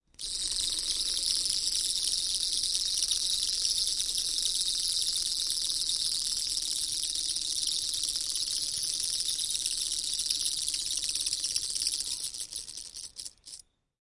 a funny vibrating wind up toy